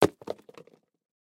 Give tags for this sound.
concrete
cement
dropping
drop
shoes
floor
shoe
tennis

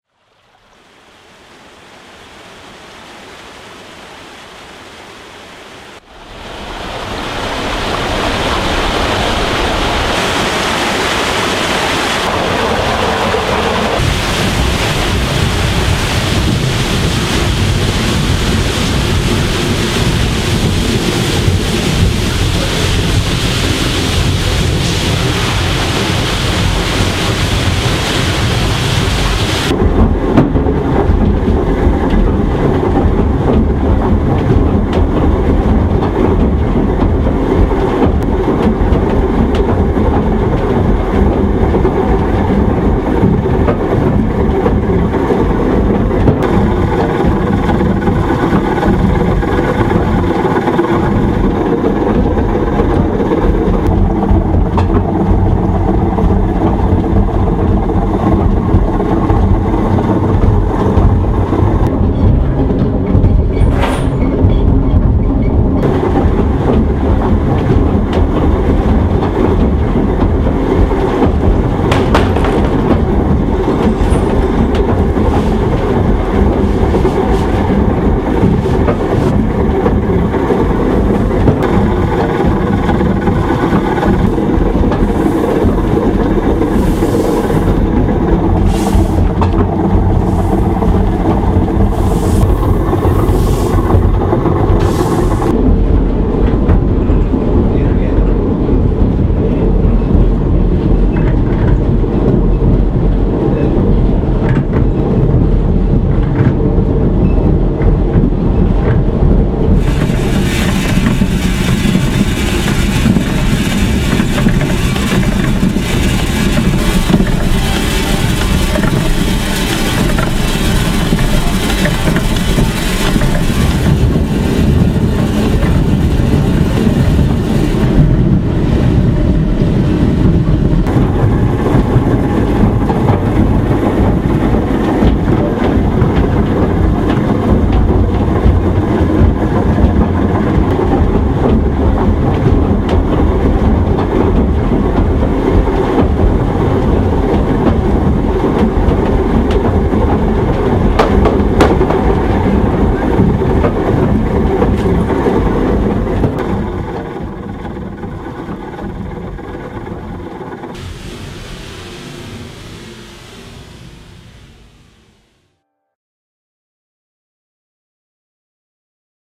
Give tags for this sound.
headstone
mill
mixing
runner
seed
watermill

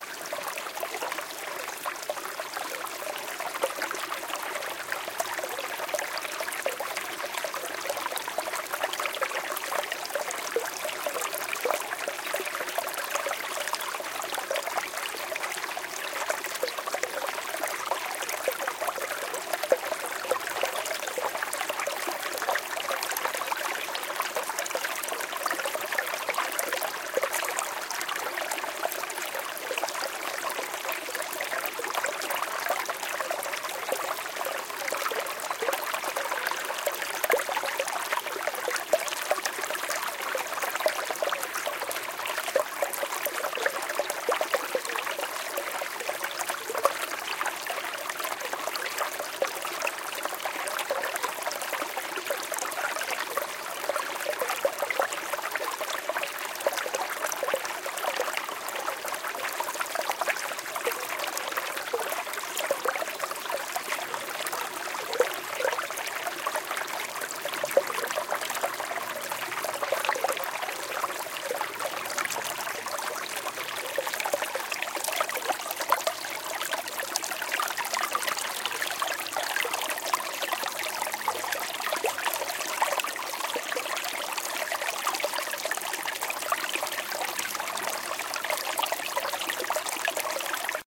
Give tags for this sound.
field-recording
water
water-stream
stream
river

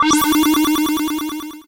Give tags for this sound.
movie
film
arcade
games
retro
cartoon
magic
video
nintendo
video-game
animation
game
8bit

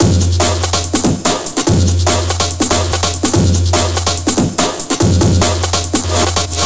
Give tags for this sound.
Hardbass
Hardstyle
Techno

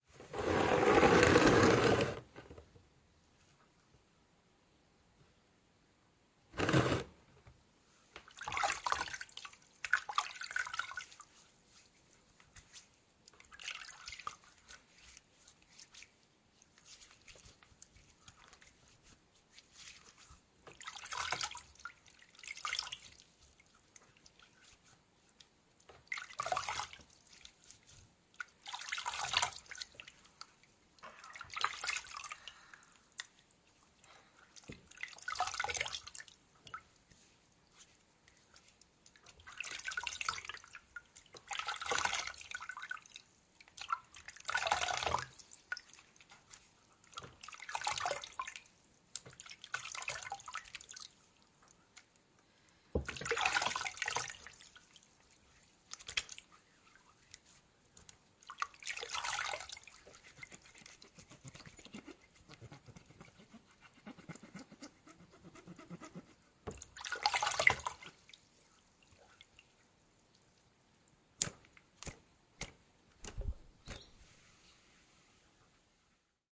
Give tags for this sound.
drop
trickle
rub
water
wet
drip
splash
flick
skin
liquid

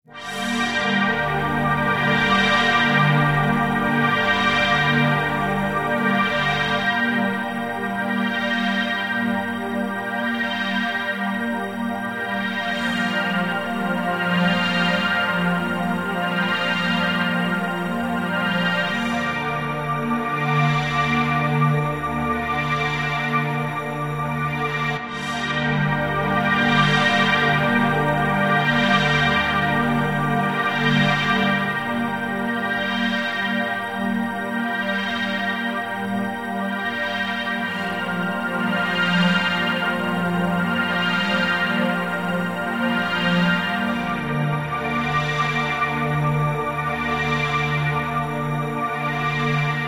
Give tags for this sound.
chord
magic
Pad
spooky
string
sustain

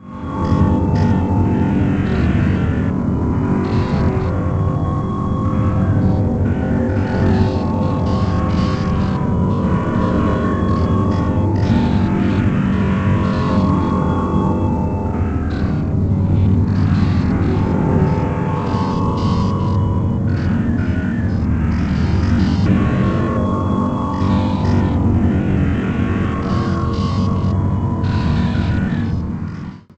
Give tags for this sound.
digital-processing
drone
hum
lisa
pad
sampled-voice
steim
voice

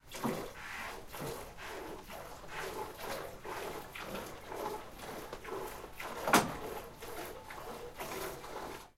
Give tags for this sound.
Milking
bucket
plastique
plastic
milk
traire
full
ch
seau
traite
Goat
lait
vre
plein

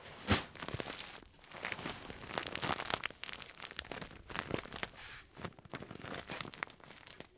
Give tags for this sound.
noise
plastic
bag
field-recording

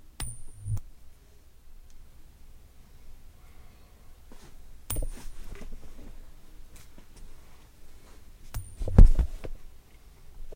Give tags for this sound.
coin
luck

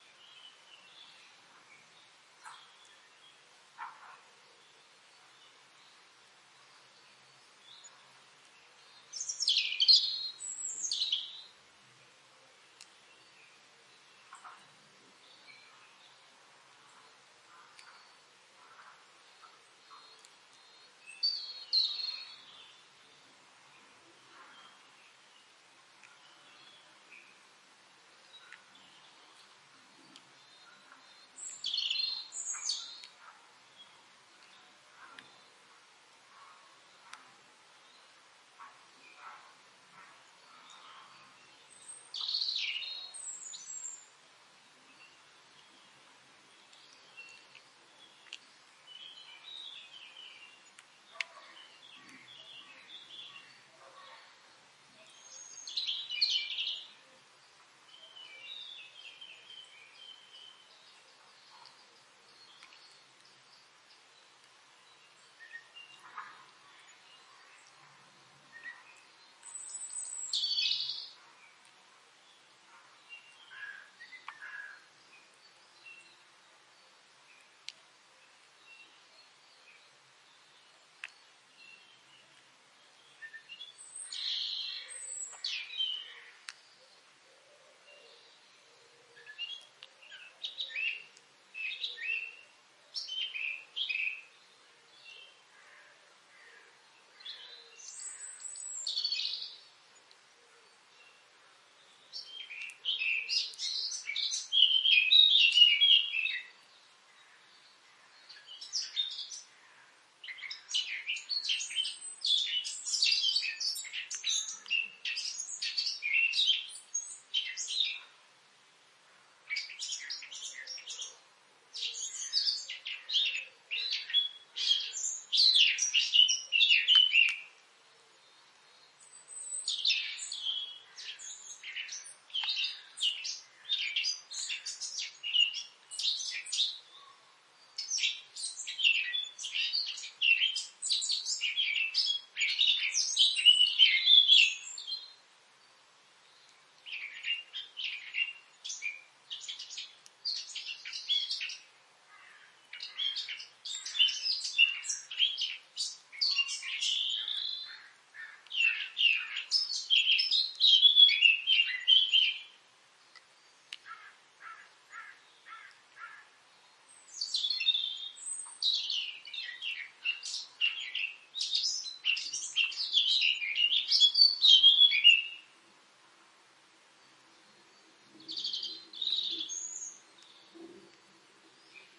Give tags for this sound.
spring
cloudy
birdsong
ambience
french-forest
nature
birds
bird

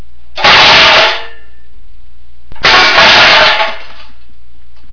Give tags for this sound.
clang
effect
fx
hard
indoor
kitchen
lofi
metal
metallic
noise
purist
unprocessed

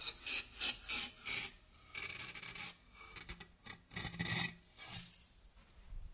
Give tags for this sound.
hits
objects
scrapes
thumps
variable

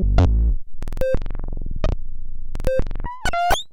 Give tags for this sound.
glitch
slinky
bass
loop
digital
synth
bleep
nord

